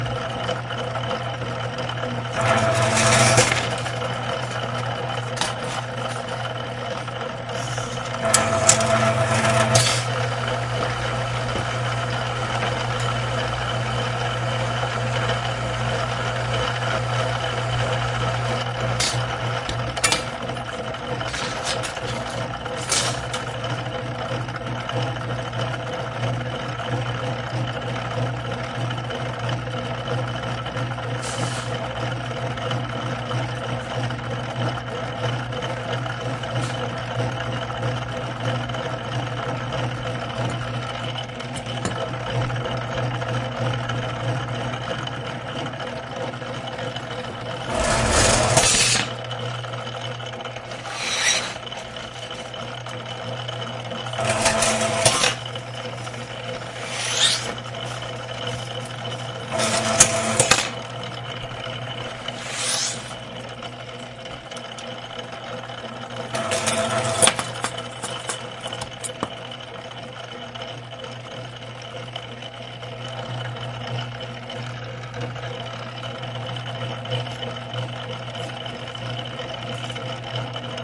machine metal cutter grinder rollers feed bend pieces1
bend, cutter, feed, grinder, machine, metal, pieces, rollers